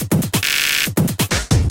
processed with a KP3.